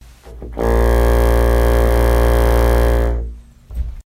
Fog Horn (Bassoon)
Fog Horn noise made with a bassoon
seafaring, fog-horn, boat